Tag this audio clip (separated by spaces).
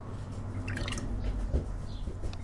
drip gurgle liquid sound splash splish trickle water wet